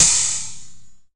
Sabian AAX Chinese 3
chinese crash, china, drum kit, drums, crash, percussion cymbals cymbal sample sabian
china, chinese, crash, cymbal, cymbals, drum, drums, kit, percussion, sabian, sample